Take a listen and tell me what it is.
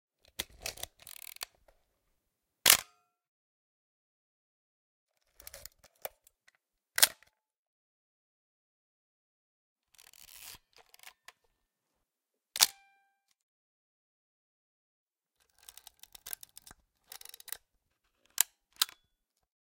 analog camera wind and shutter click #2
Winding and firing of the shutter of four vintage analog cameras. All shutter speeds were set to 1/125th of a second (except the last one). The order of each take is as follows:
1. Pentax Spotmatic (from around 1971)
2. Yashica TL-Super (from around 1969)
3. Nikon FM (from around 1975)
4. Yashica Electro 35 (rangefinder with leaf shutter from around 1972)
analog, analogue, mechanical, nikon, pentax, photo, wind, yashica